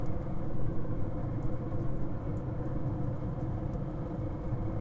cool hollow wind cavern effect chimney fireplace air suck
air, cavern, chimney, cool, effect, fireplace, hollow, suck, wind